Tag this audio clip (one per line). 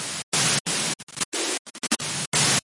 strange
extraneous
noise
rancid
weird